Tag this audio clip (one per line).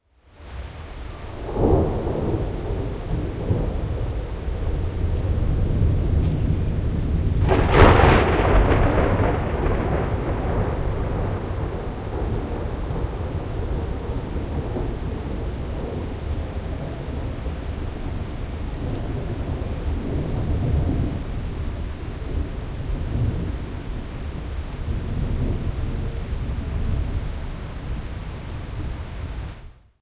rain
street-noise
thunder
nature